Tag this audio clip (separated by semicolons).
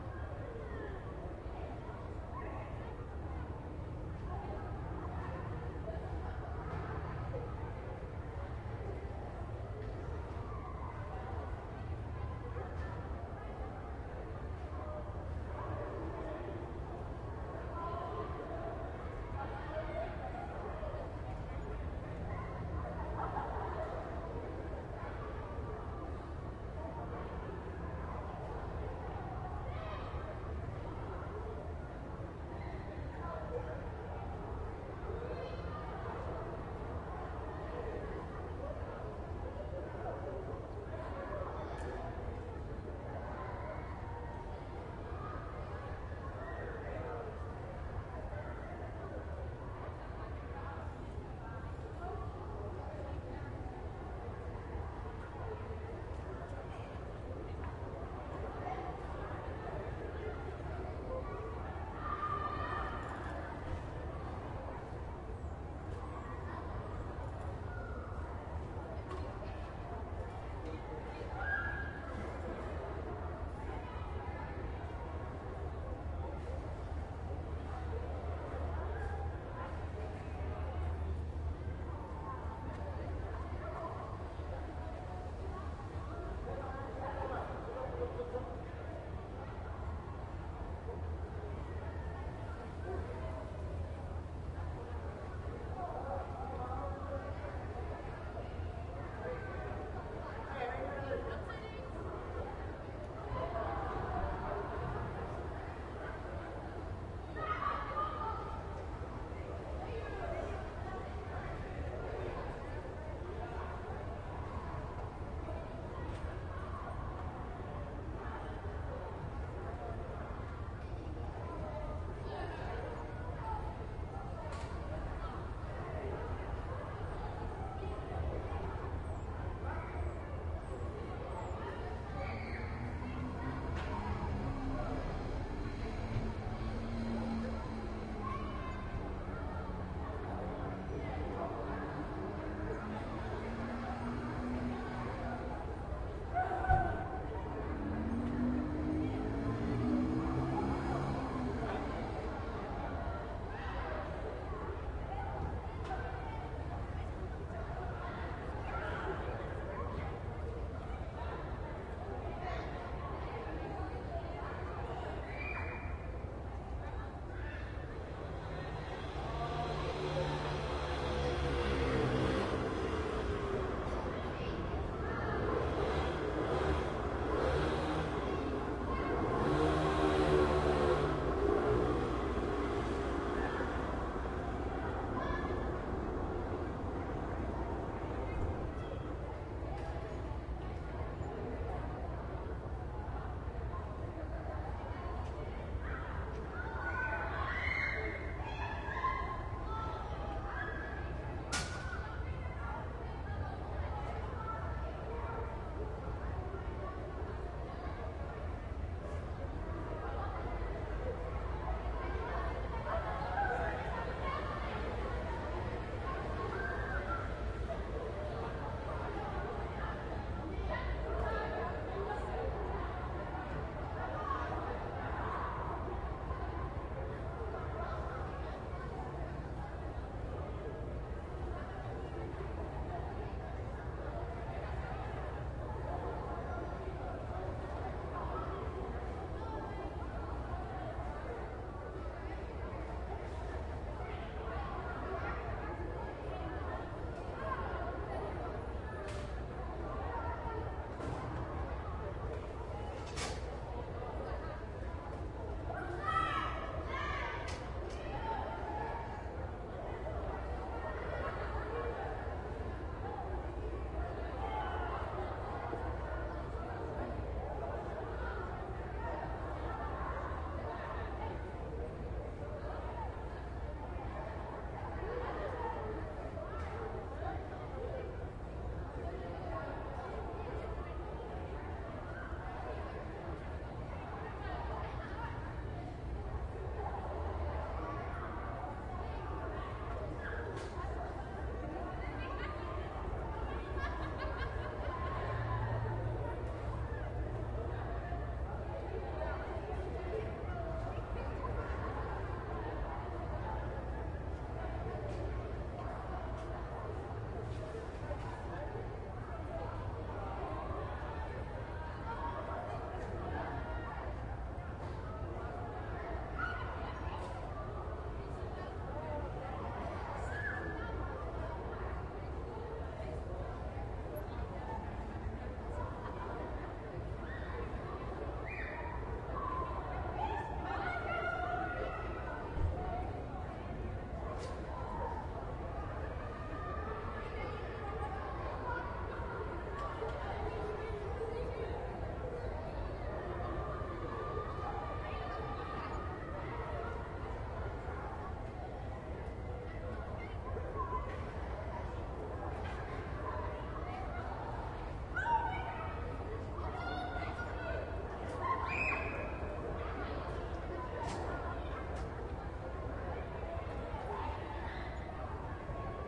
high-school; background; children; distant; playing; Playground